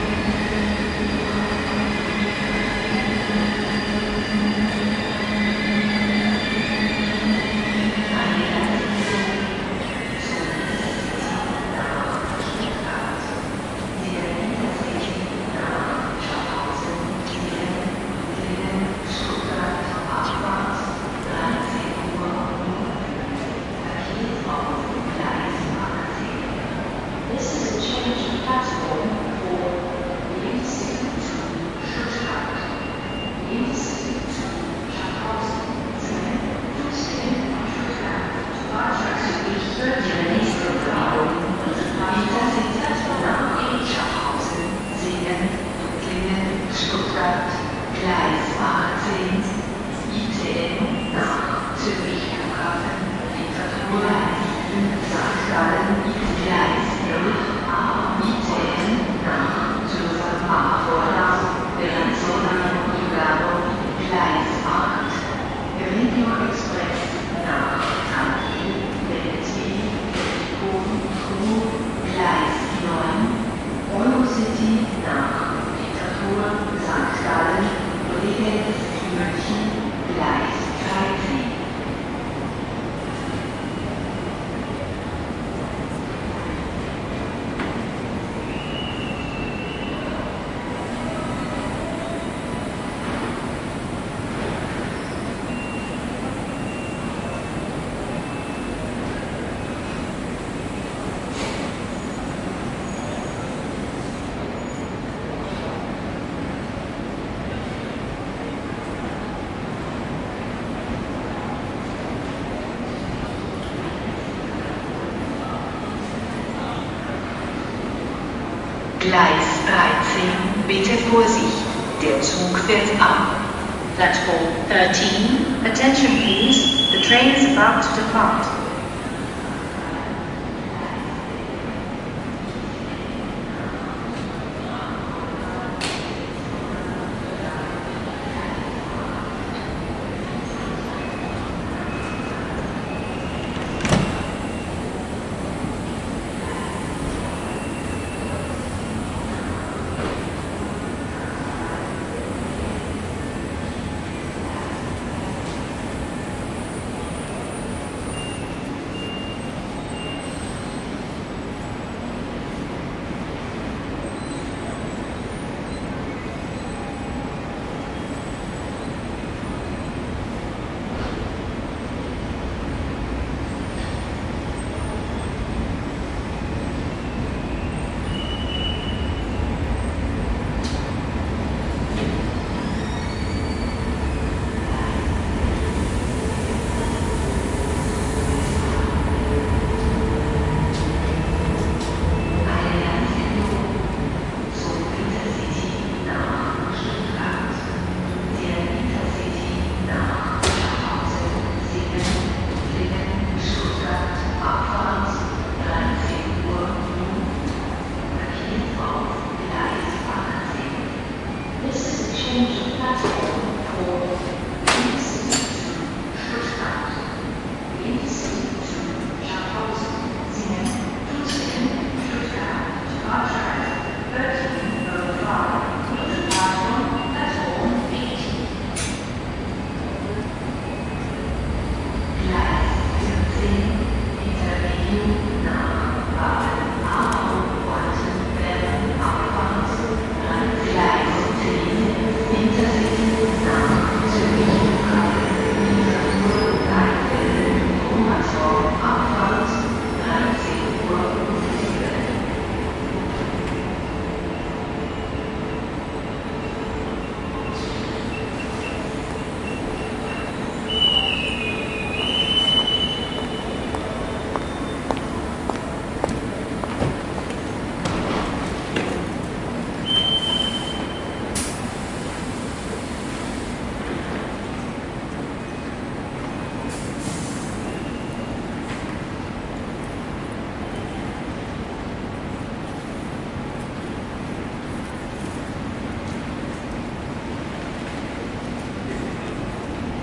Sound Atmo Zurich Main Station
Train announcements in Zurich Main Station (mainly german)
railway, Mittag, HB, Switzerland, ftig, Z, FFS, Ankunft, Bahnhofsansage, train, platform, SBB, rich, Hauptbahnhof, Bahnhof, Atmo, ge, station, gesch, de, ferrovia, Abfahrt, CFF, announcement, Zurich